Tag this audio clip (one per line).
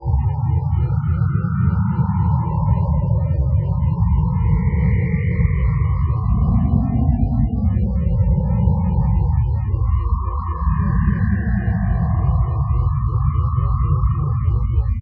space
soundscape
swamp
alien